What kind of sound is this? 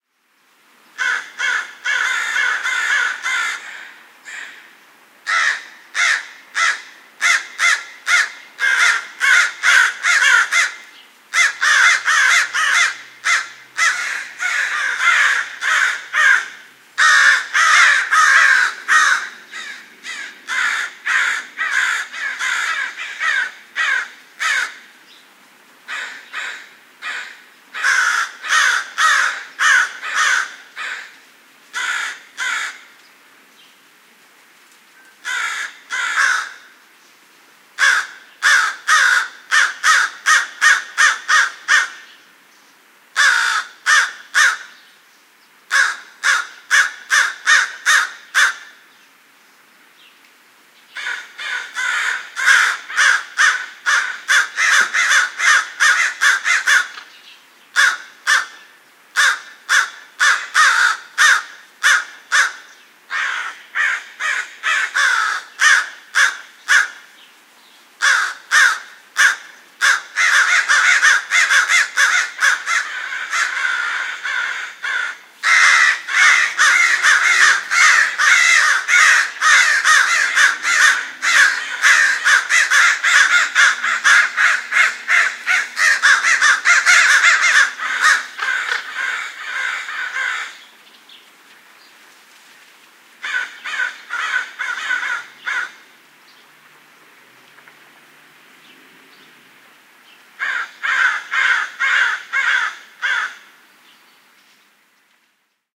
These crows were going crazy in my Los Angeles neighborhood, so I had to record them.
Recorded with: Sanken CS-1e, Sound Devices 702t